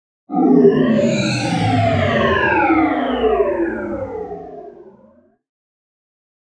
Powering down your futuristic vehicle... or robot? I dunno. This one has more mid range and is a balance of ver.1 and ver.2.